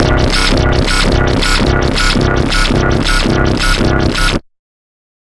110 BPM, C Notes, Middle C, with a 1/4 wobble, half as Sine, half as Sawtooth descending, with random sounds and filters. Compressed a bit to give ti the full sound. Useful for games or music.
notes, dubstep, bass, wobble, techno, synth, digital, Industrial, LFO, synthetic, 1-shot, synthesizer, electronic, wah, porn-core, processed